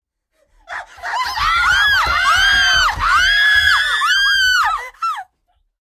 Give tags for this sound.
666moviescreams; kill; fear; murder; scary; nightmare; group

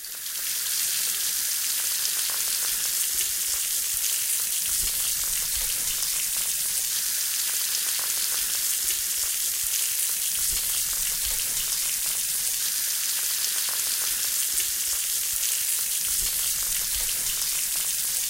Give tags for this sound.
running; water; sink

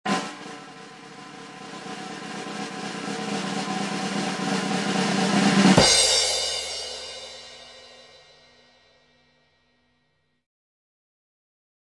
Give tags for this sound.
Long; cymbal; drums; classic; Drum; Roll; snare; 765; ceremony; Octagonapus

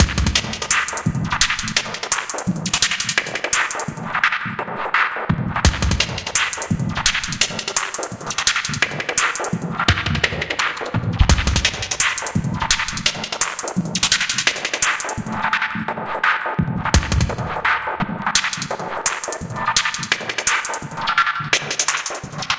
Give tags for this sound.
beat; drum; drum-loop; drums; electronic; glitch; groovy; loop; percussion; percussion-loop; rhythm

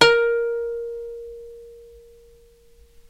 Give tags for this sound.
sample; ukulele

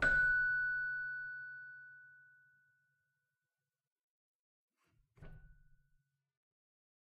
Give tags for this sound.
bell; celesta; chimes; keyboard

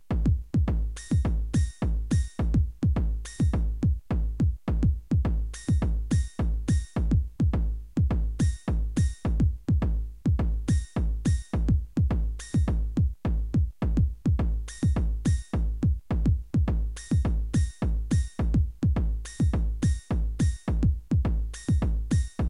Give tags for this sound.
beats; processed

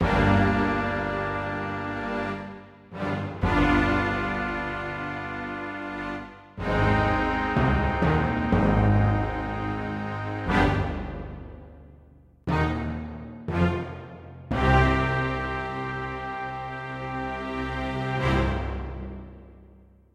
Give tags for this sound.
breakdown; introduction; middle-ages

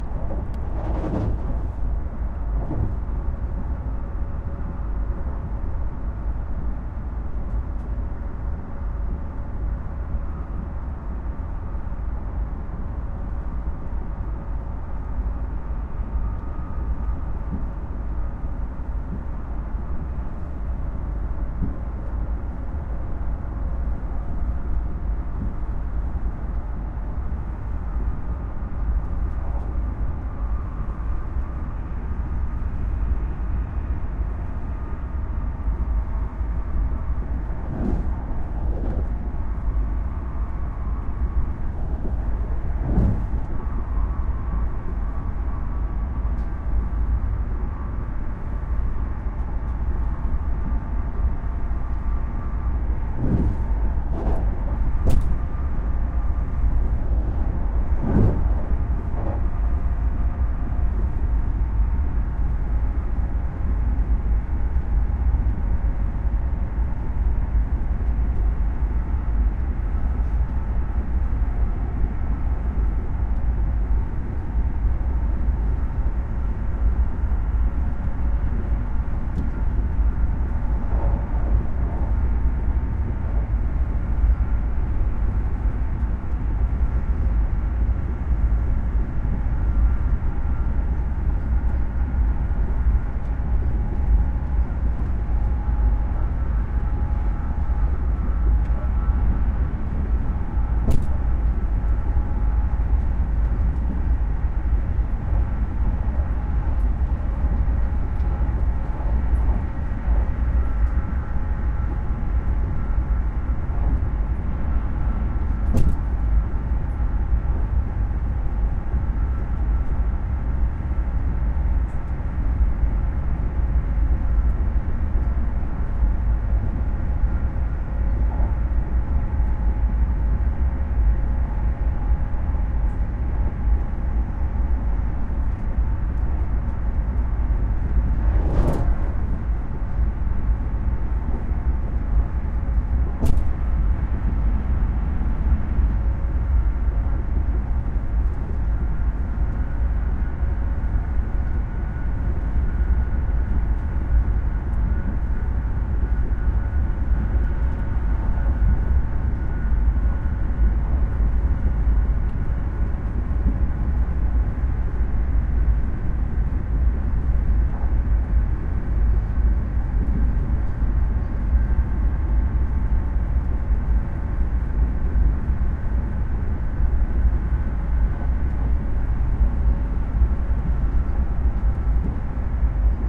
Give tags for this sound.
Ride; railway; Train

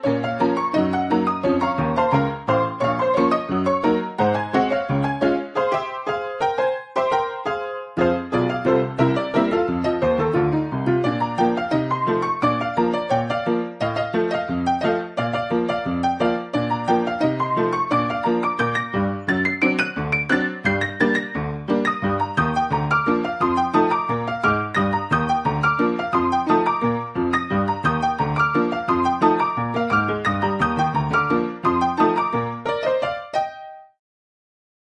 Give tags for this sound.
honky-tonk; honky-tonk-piano; honky-tonk-vst; jangle-piano; junk-piano; ragtime-piano-vst; tack-piano; tack-piano-kontakt; tack-piano-vst; tack-piano-vst3; western-saloon-piano

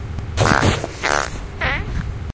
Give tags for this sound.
aliens; gas; explosion; car; flatulation; race; flatulence; frogs; space; poot; fart; noise

